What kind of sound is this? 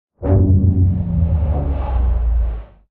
Large Engine
Sounds like a spaceship taking off into hyperspace. This is an example of digital signal processing since this was created from recordings of random household objects in a studio.
DSP, Engine, Engine-Cooldown, Large-Engine, Sci-Fi, Spaceship, Takeoff